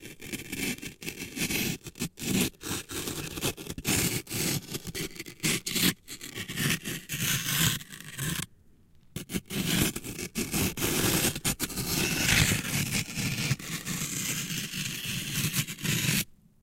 Stone scratching over rock (close up), H6
Two sandstones scratching slowly on each other.
Recorded in a german region named "sächsische Schweiz" on a
I used the XYH-6 mic.
close-up, concrete, grinding, rock, sandstone, scraping, scratch, stone